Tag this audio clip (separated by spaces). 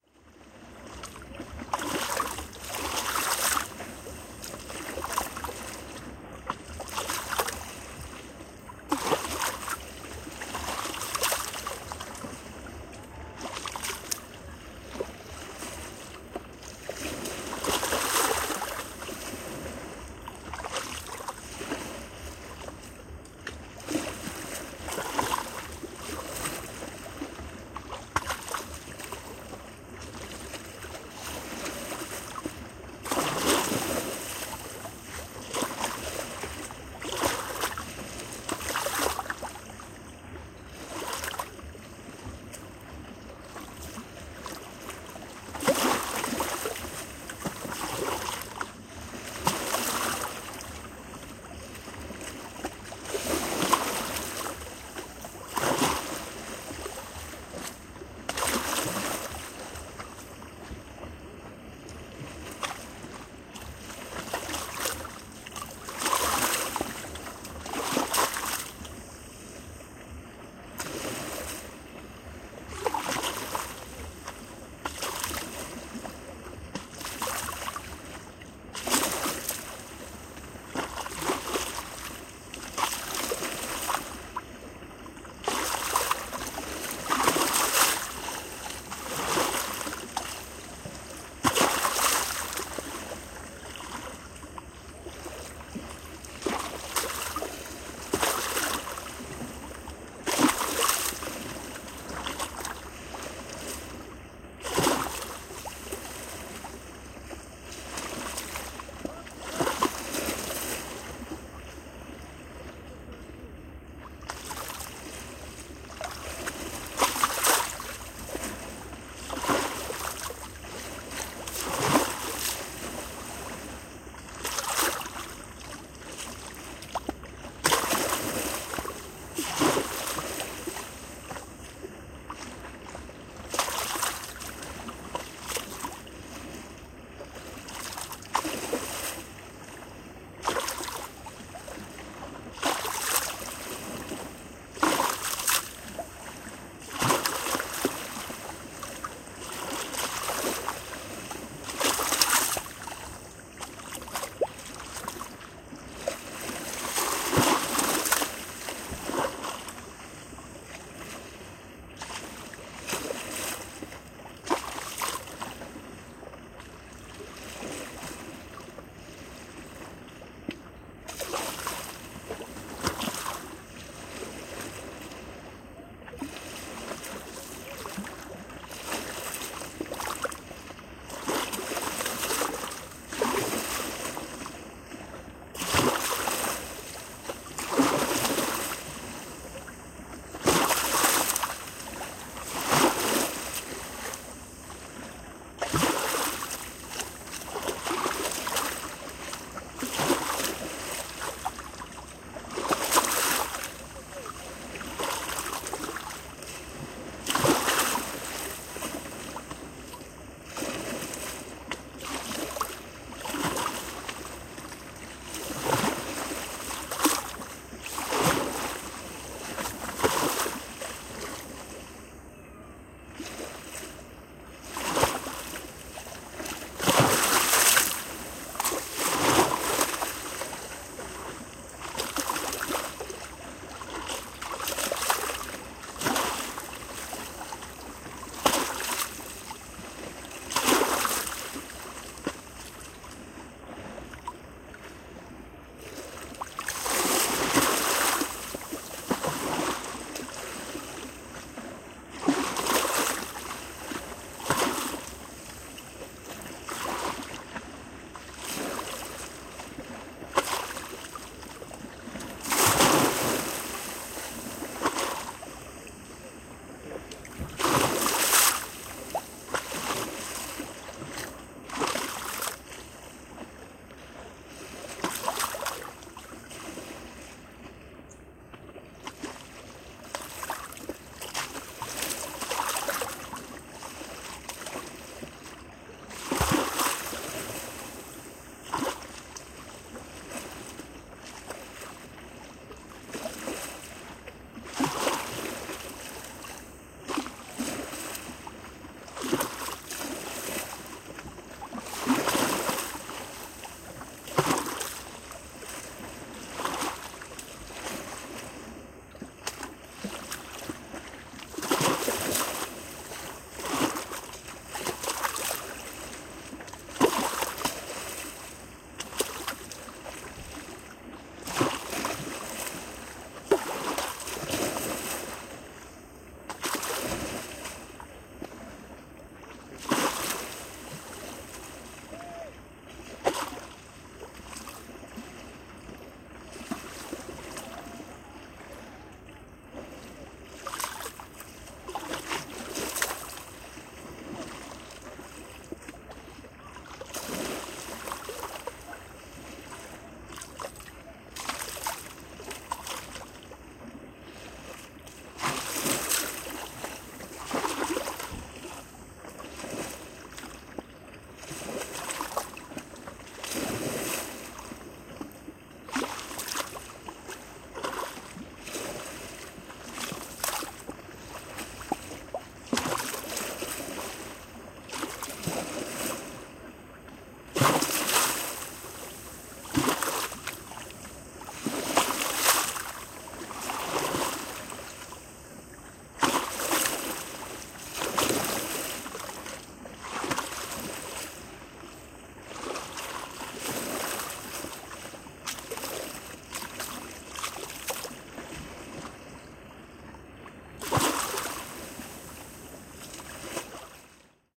beach tunisia